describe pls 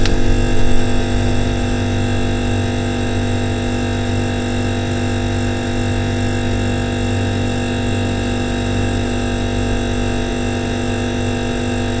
Humming of an old fridge
sound, sound-effect